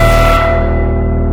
Big Alarm
A sound effect made from layering "glued" (converted) elements of a plugin pieced into an alarm sound effect. Made to repeat.